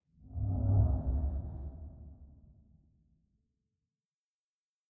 LR 1 EFF SURREALE
Another surreal effect created and used for some psychedelic film
abstract, Another, created, effect, film, future, fx, psychedelic, reverb, sci-fi, sfx, some, sound, strange, surreal, used